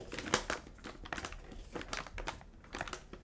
Opening Package
Opening a package of wet wipes.
FX, Package, Small